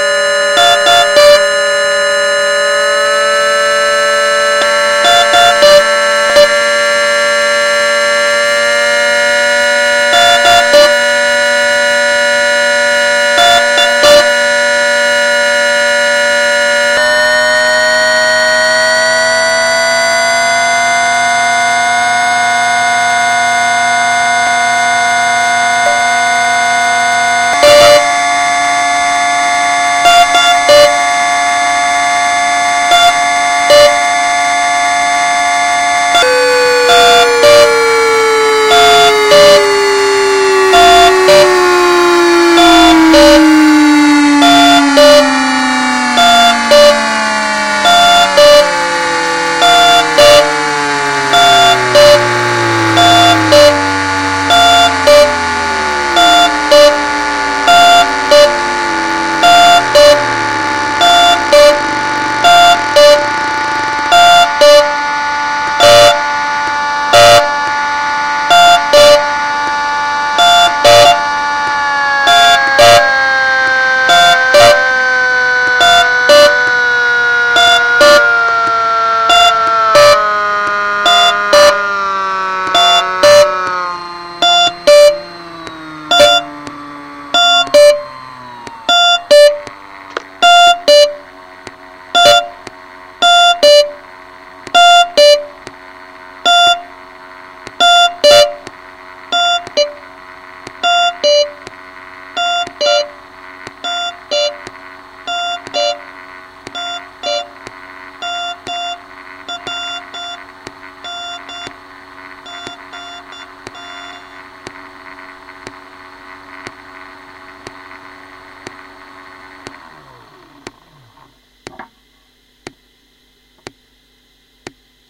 small, short sounds that can be used for composing...anything